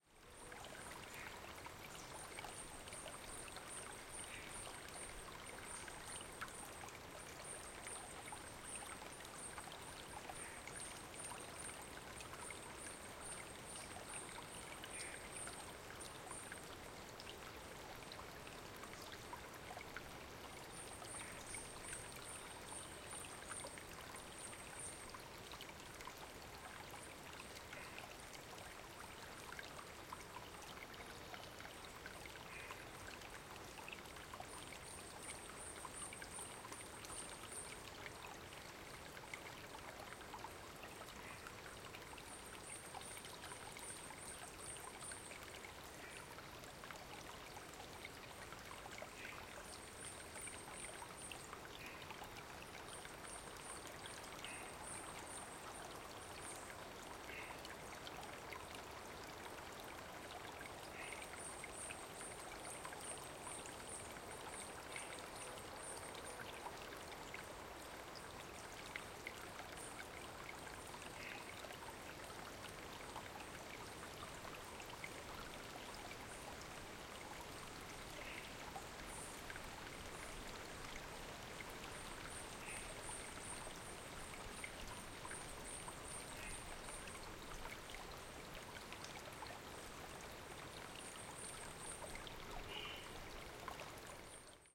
Gentle Creek in Rain Forest with Cicadas